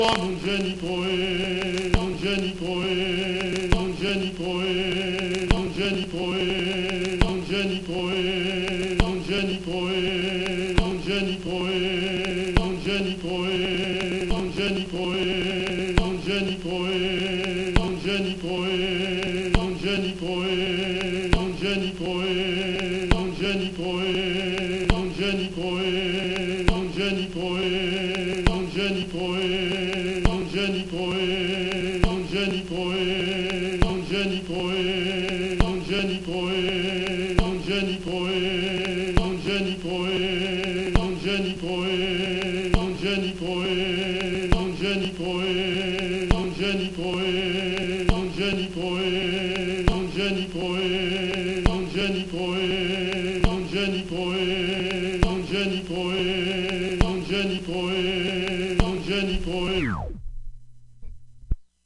Record noise from a very old, warped and scratched up voodoo record from early last century digitized with Ion USB turntable and Wavoasaur.